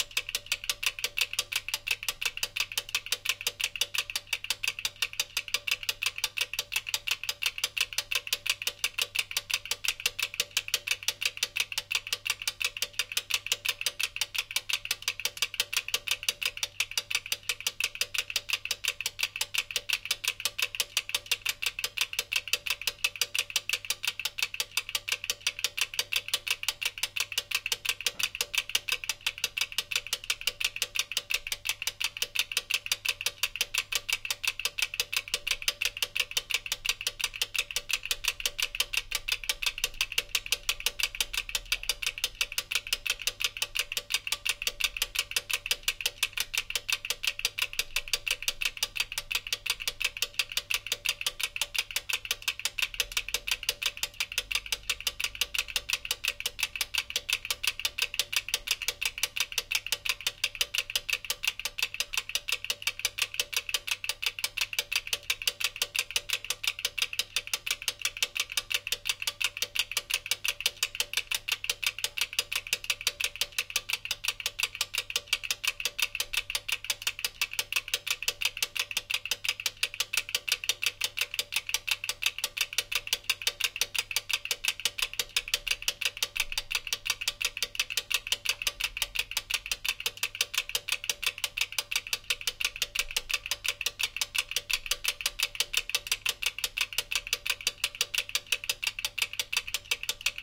Timer Ticking
A ticking timer built into an electric oven. The ticking is quite fast and has quite a bit of a 'clunk' as it resonates through the panel of the cooker. Could be used as the countdown timer of a bomb.
Recorded using a pair of Lewitt LCT540S microphones into a Zoom F3.
This file will loop smoothly so if you need the sound to last longer, simply paste it after itself or loop it.
counter, 1-10